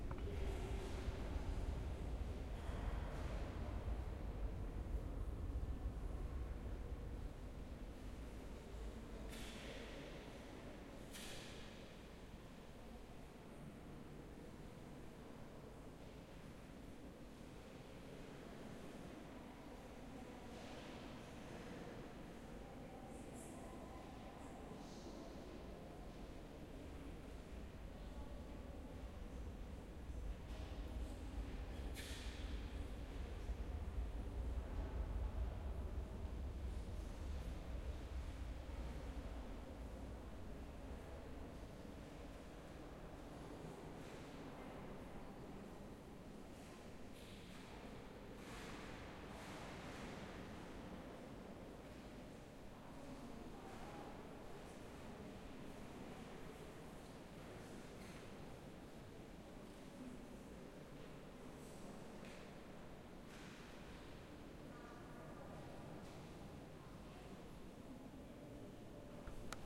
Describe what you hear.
An atmosphere I recorded in a church in Budapest. Recorded with a zoom H4n and completely unprocessed.